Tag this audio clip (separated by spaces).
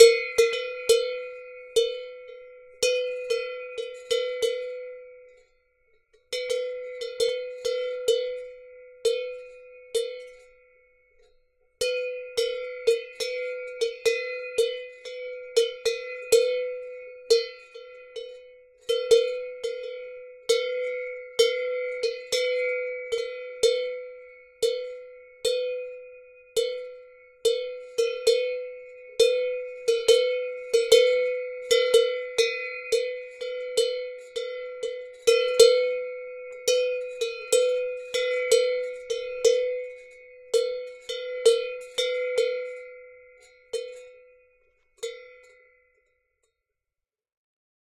bell cow cowbell